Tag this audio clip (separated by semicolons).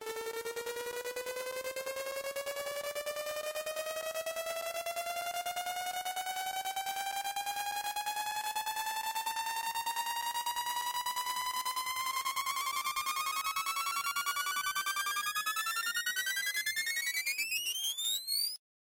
Audacity; Rise; Sawtooth